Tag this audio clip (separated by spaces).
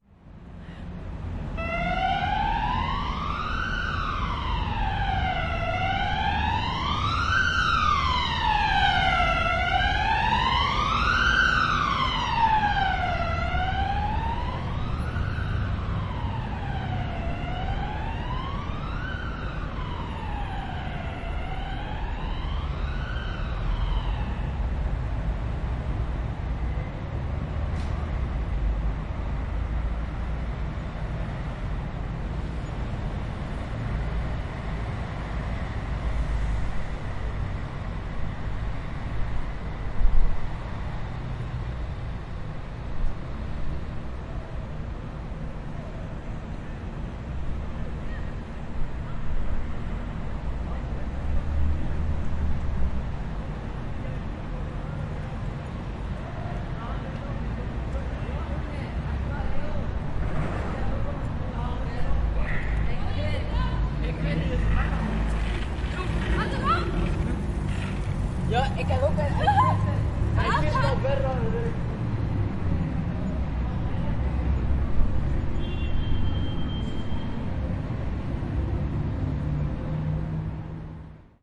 ambulance car car-noise cars city city-hum city-noise field-recording kids street traffic